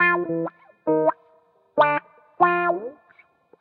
GTCC WH 04
samples, guitar